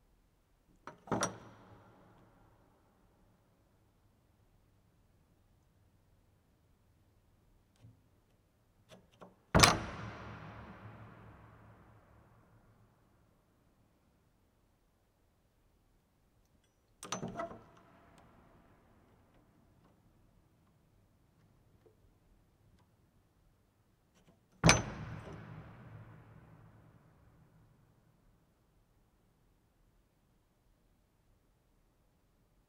Old door lock in the Noirlac Abbey, Bruere Allichamps, France. Lots of natural reverb, echo, delay and acoustics.